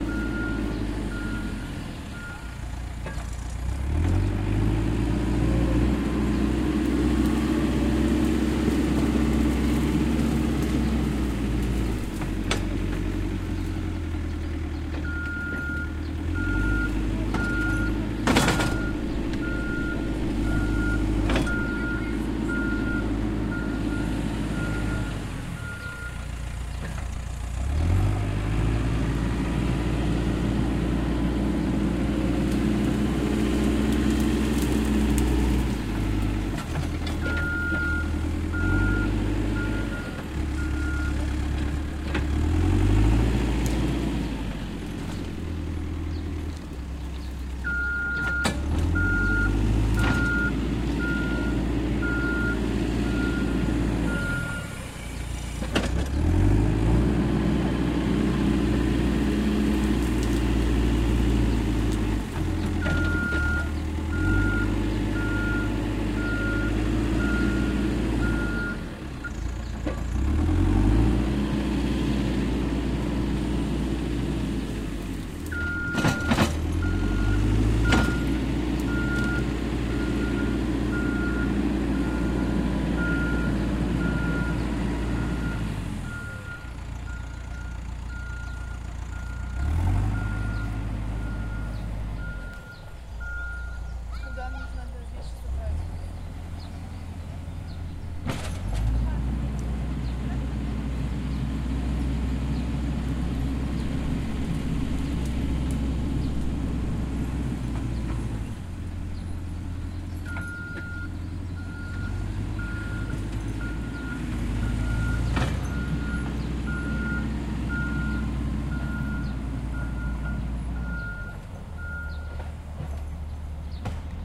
Tractor work in the city park. Clearing the site.
Recorded: 2015-06-25
Recorder: Tascam DR-40